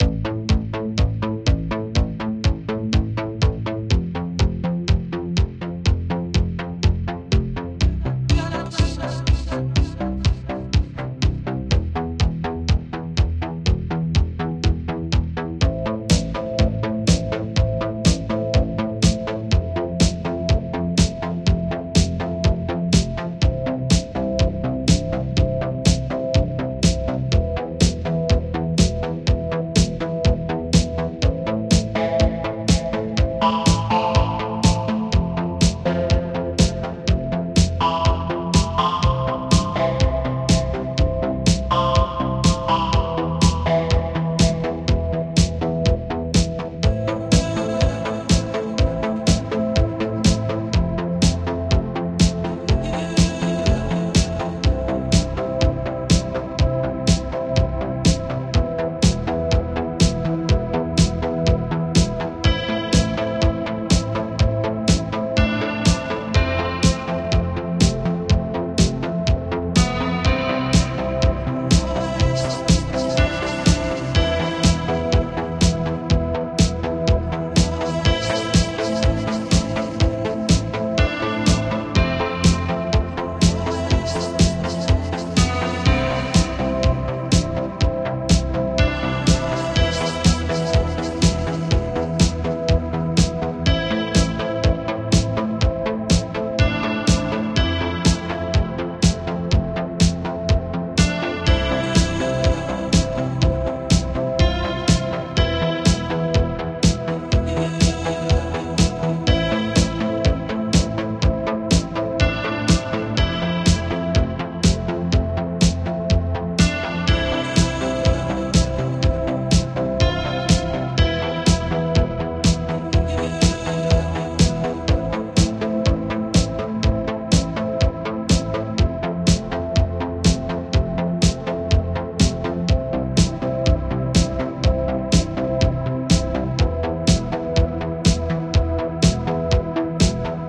Tecno pop base and guitar 2.
Synths:Ableton live,Silenth1,Kontakt.
rhythm, track, electric, original, synth-bass, pop, metal, loop, clean, chord, Tecno, quantized